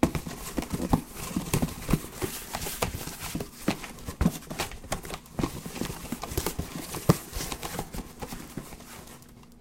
Fumbling with a Box
The sound of trying to open a box without succeeding.
Recorded using Audacity on a Mac with a Blue Yeti microphone.